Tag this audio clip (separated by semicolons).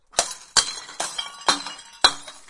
hammered-glass; smashed-glass; Breaking-glass